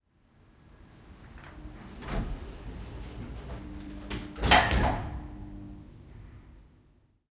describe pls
elevator door close 2
The sound of a typical elevator door closing.
Recorded at a hotel in Surfer's Paradise with a Zoom H1.
closing, door, elevator, lift, mechanical, open, opening